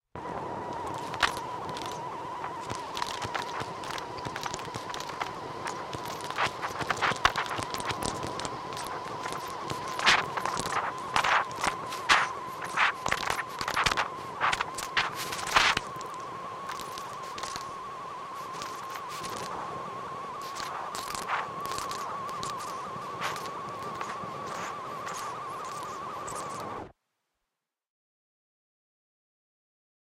Tape FastForward
Sound of c-tape played while rewind.
c-tape, fast-foward, casette, tape